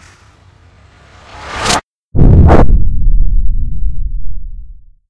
Ship into hyperspace
a space ship starting up and going into hyperspace
Sci, Fi, Game, Sound, Effect